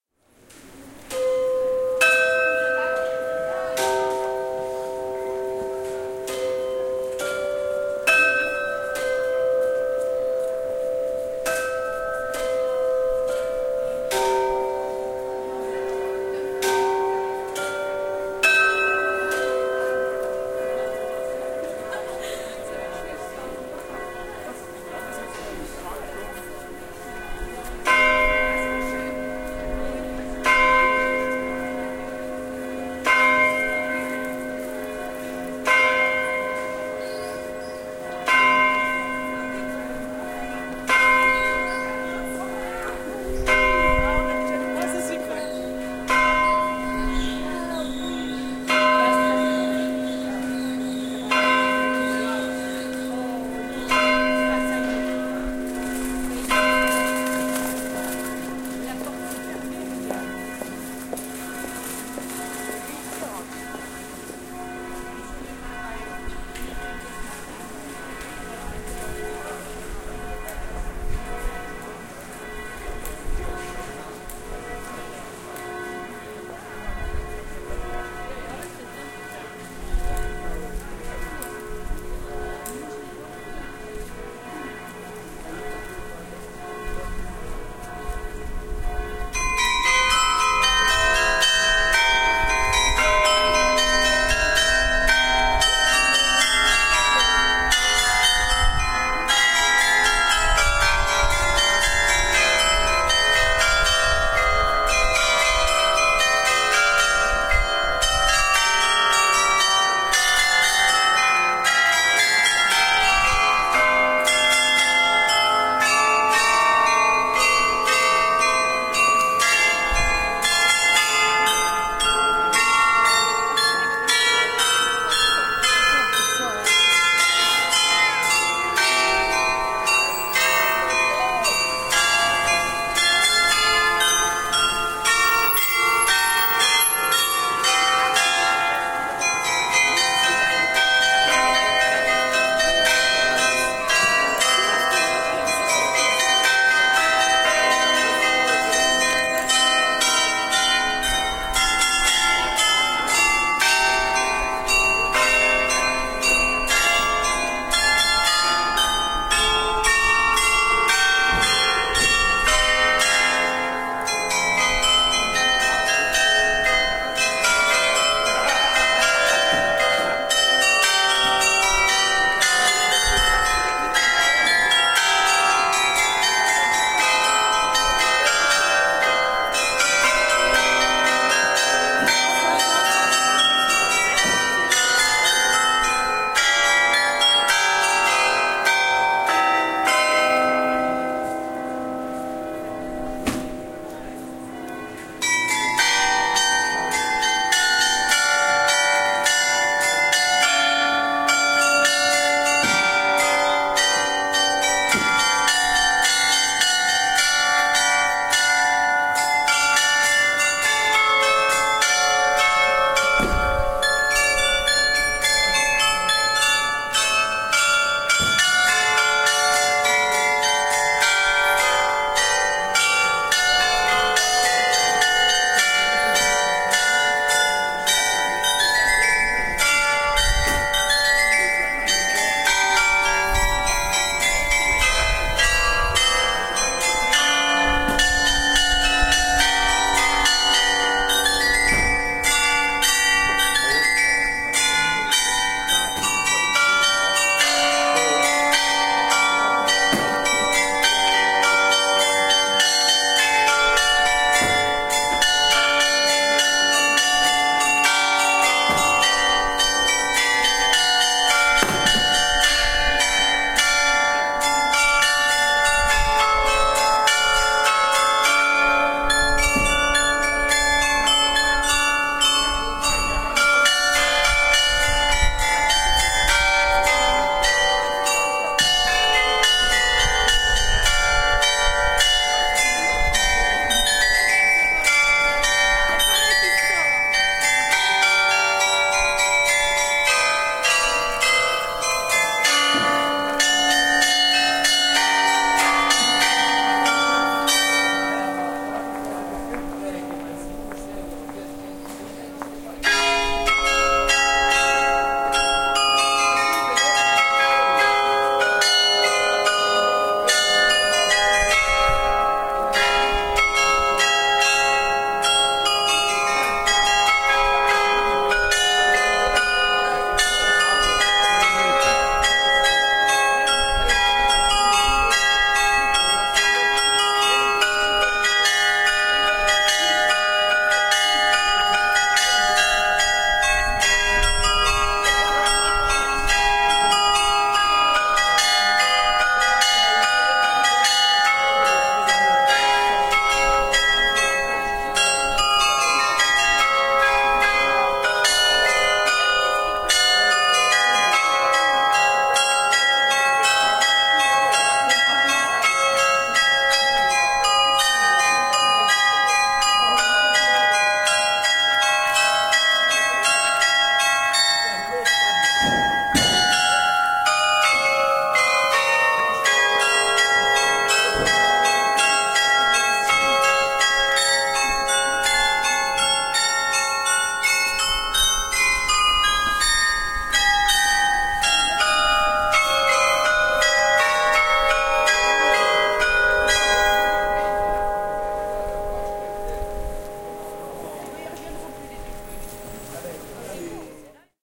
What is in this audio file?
Wuppertal-Clock
Polski: Dźwięki jakie słychać na ulicy w Wuppertal. Muzeum zegarów w Wuppertal zawiera przykłady najstarszych zegarów. Do pięciu razy dziennie można usłyszeć tutaj niezwykłe kuranty. Ponadto, na rogu muzeum wisi unikatowy na skalę światową zegar trzykołowy obok pierwszego na świecie zegara strefowego.
English: The Watch Museum in Wuppertal Elberfeld downtown contains the very first attempts of watches as well as examples of ancient sand clocks, sundials and water clocks. Up to five times a day here ringing a chime. In addition, at the corner of the museum hangs the world's unique three-wheel clock next to the world's first World Population Clock.
German: Das Wuppertaler Uhrenmuseum in der Elberfelder Innenstadt enthält allerersten Versuche von Uhren sowie Beispiele von uralten Sanduhren, Sonnenuhren und Wasseruhren. Bis zu fünf Mal pro Tag läutet hier ein Glockenspiel.